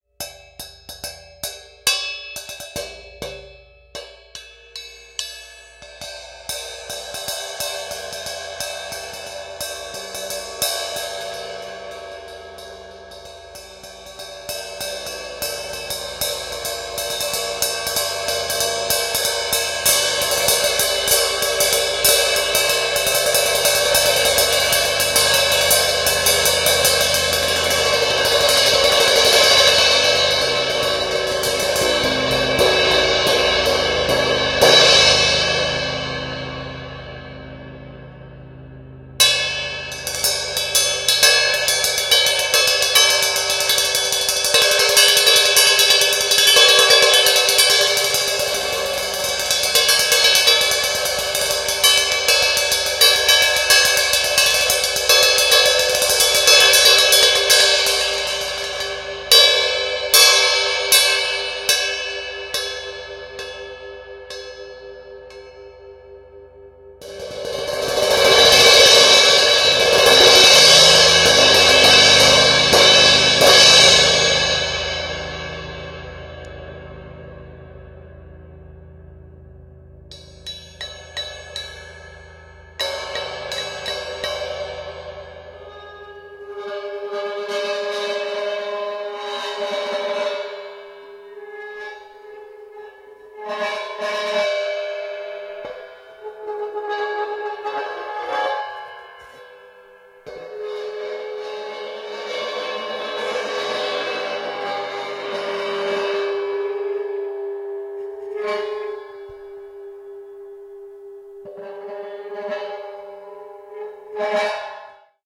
UFIP Ritmo Bounce Ride Medium 18 Inch Cymbal (Vintage Italian Rotocasted)
Vintage cymbal, probably from the 70s, made by Italian "Ear Crafted - Hand Made" company UFIP. I'm demo playing it, mono recorded in a dry room (Q-Factory rehearsal space in Amsterdam) with Shure SM58 going into MOTU Ultralite MK3. Some limiting.
rhythm, drums, drum, cymbal, crash, rhythmic, handmade, cymbals, UFIP, swing